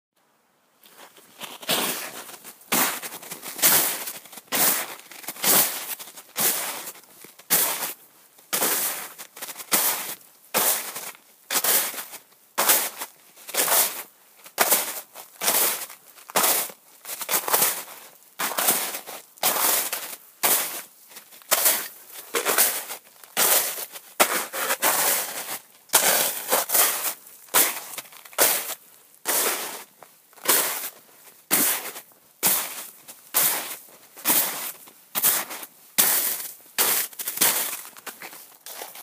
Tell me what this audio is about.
footsteps in snow
Man walking in thaw snow